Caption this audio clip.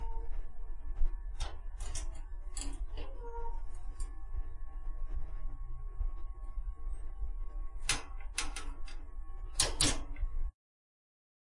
Steel Cage Opening
Used for opening and or closing of any metel cage
cage, mellow, metel, soft